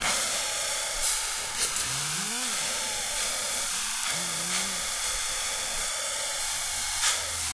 Delayed noisy inhaling sound. Comb filtered.